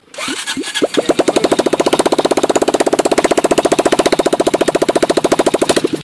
Bubble Engine Starting
Recorded this from an old scooter.